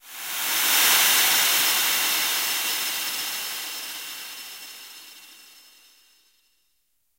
A soft, layered Sound that sounds like the release of air or gas